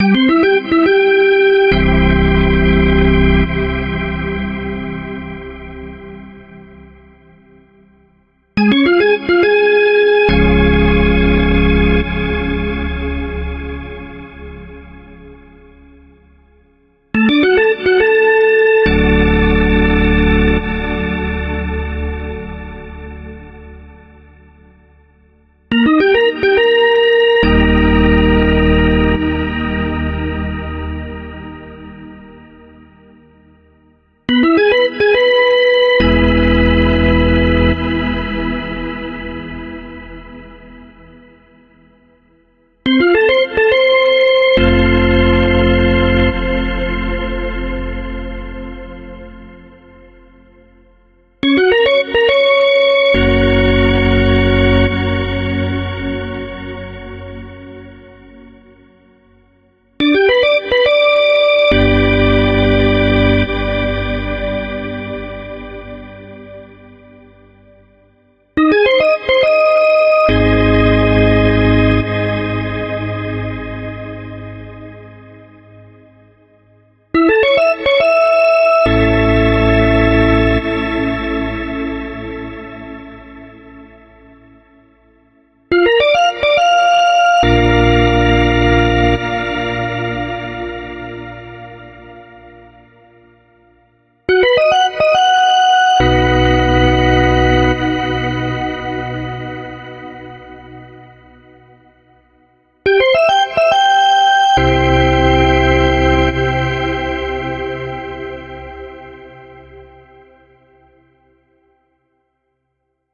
A crowd energizer that is played in down times during hockey, baseball and other sports. Recorded over the semitones in 1 octave. This one is played with a standard drawbar organ.